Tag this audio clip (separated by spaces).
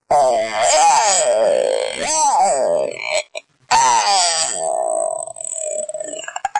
effects brutal demonic sound creepy game